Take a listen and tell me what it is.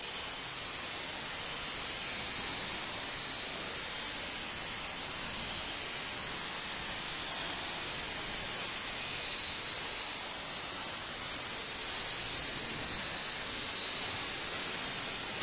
Noise processed with various Audacity effects until it felt like it could influence brain functions.